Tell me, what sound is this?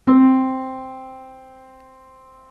piano note regular C
c, note, piano, regular